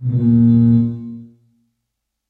tone created for video game